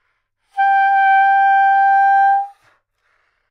Sax Soprano - G5 - bad-richness bad-timbre
Part of the Good-sounds dataset of monophonic instrumental sounds.
instrument::sax_soprano
note::G
octave::5
midi note::67
good-sounds-id::5863
Intentionally played as an example of bad-richness bad-timbre